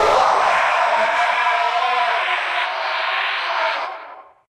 Jump scare sound used for unit 73- Sound For Computer Games
I made this sound by recording voice when I was raging playing video game, I pitched it down to like -20% + added reverb
■Audacity
■Blue Yeti
■Made at home, when playing game (Arma 3)
audacity, deep, horror, human, jump, pitch, reverb, scare, scary, scream, unit78, voice